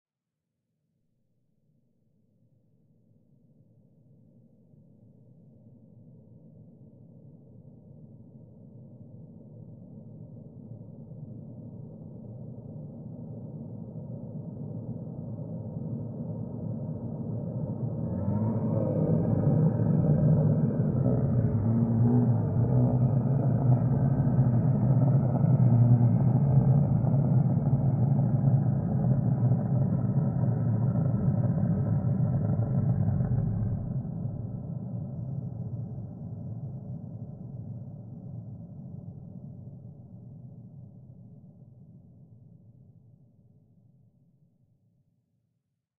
low revers reverbs
low, noise